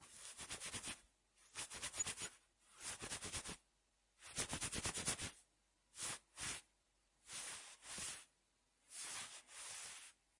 Rubbing clothes fabric
Thank you for the effort.
Rubbing, fabric, clothes